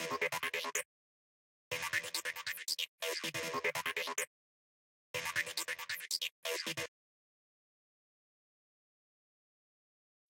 synth sequence using sylenth and camel audio camel phat